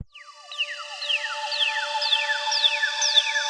High pitched whistle synth sound
This is a short sample I created with the cool vst Serenity.